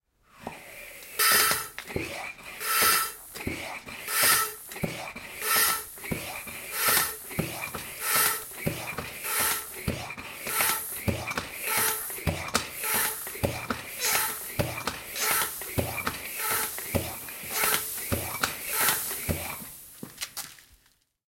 inflating-tires2
On the recording you will hear small tire inflated by the pump.